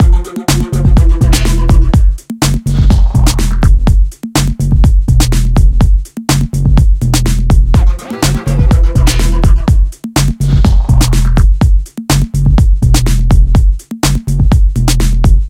Weird Night Beat by DSQT 124 bpm
Just a dark(ish) breakbeat loop I made in a few minutes. I have no use for it, but you can decide its destiny!